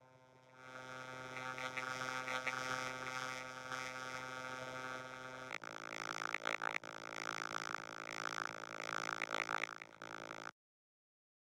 alien chatting
Two aliens chatting
fiction, science, chat, alien